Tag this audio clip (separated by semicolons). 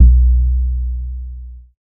bass; sub; subbass